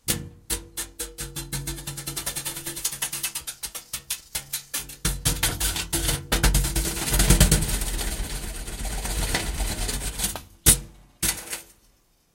Brush hits on metal object
random, thumps, brush, hits, taps